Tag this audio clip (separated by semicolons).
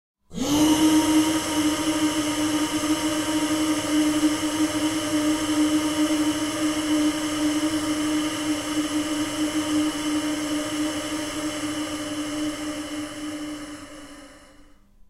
air; breath; granular; noise; processed; shock; shocked; suspense; tension; wind